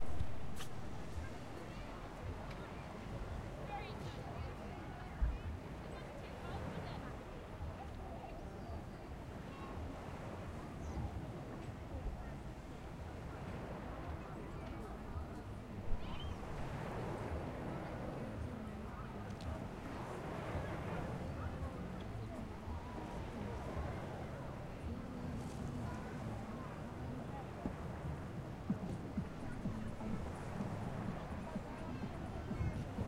Ambient sounds of sea and people and amusements at Herne Bay, Kent, UK in the last week of July 2021. Things were probably a little quieter than usual because of coronavirus even if the official lockdown ended a week or so earlier.
ambient, field-recording, Herne-Bay, Kent, seaside